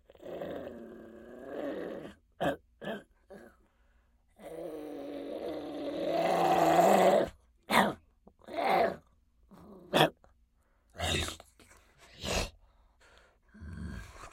Velociraptor Growls
velociraptor, growl, dinosaur